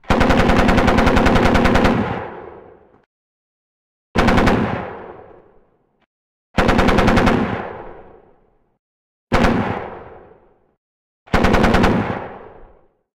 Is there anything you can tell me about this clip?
Heavy machine gun
A cool and stereotypical sounding machine gun sound I created with Matt_G's M240 machine gun sound and a lot of hard processing.